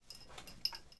27 casco metal
foley, movimiento de casco militar.
soldier, steel, helmet